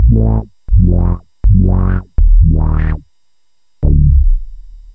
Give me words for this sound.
baslline1 einzeln
handplayed bass sounds on a korg polysix. space between the notes for sampling.
bassline, polysix